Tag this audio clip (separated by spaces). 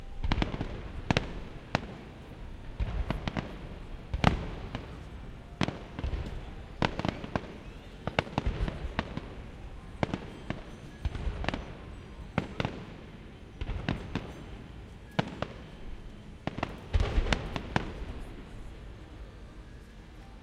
Montreal small fireworks